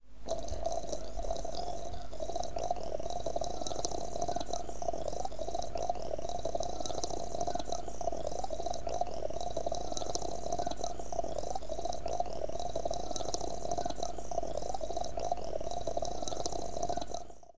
Boiling Liquid
boiling, bubbling, cooking, environmental-sounds-research, gurgling, liquid